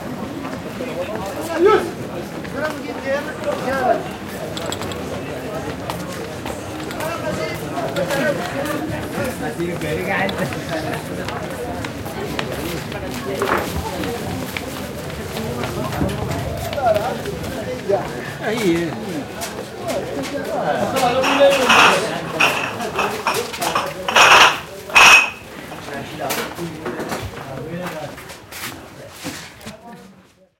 Souks Marrakesch 2
Atmosphere walking through she "Souks", the biggest market on the african kontinent.
Marokko, north, africa, Marrakech